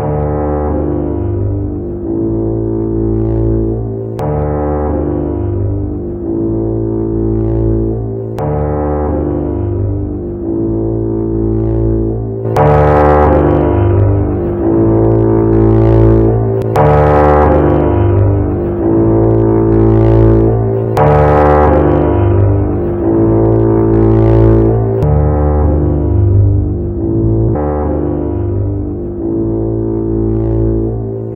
artificial machine noise looped